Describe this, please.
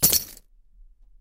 found keys 1
Dropping a set of keys on a leather padded chair